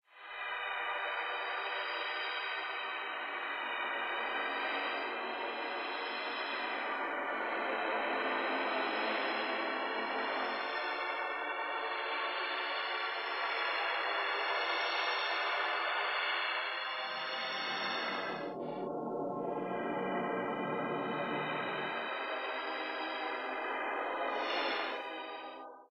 1998 texture generated with "Mushroom."